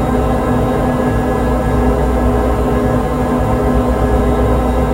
Created using spectral freezing max patch. Some may have pops and clicks or audible looping but shouldn't be hard to fix.
Atmospheric, Freeze, Still, Soundscape, Everlasting